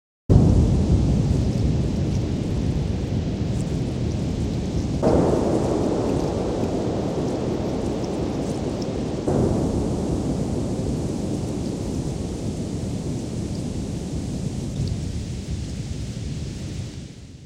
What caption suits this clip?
A thunder storm accompanied by heavy rain